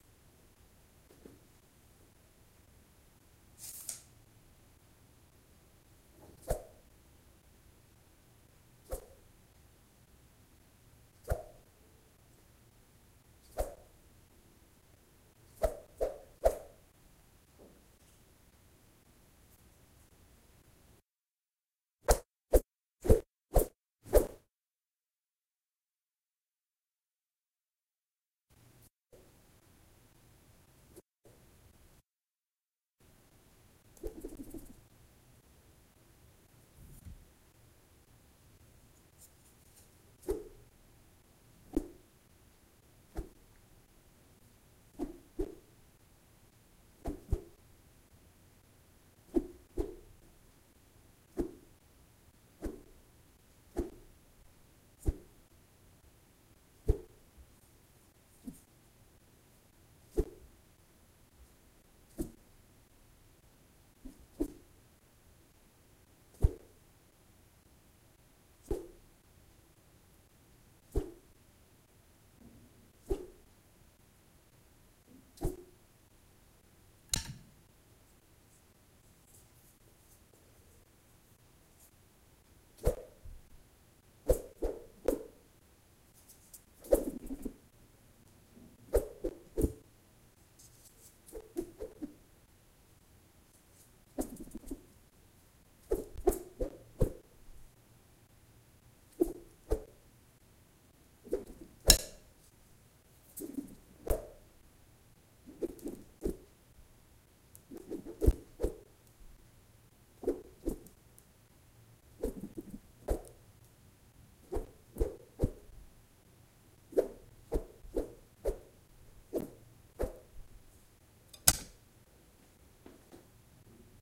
Natural air whooshes made with wooden tubes in air. Recorded in my home studio without noise cover.
air, attack, bamboo, domain, flup, movement, natural, public, punch, real, stick, swash, swhish, swish, swoosh, swosh, whoosh, wisch, wish, woosh